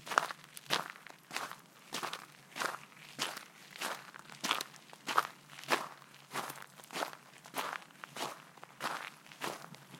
footsteps on gravel.
walking, steps, walk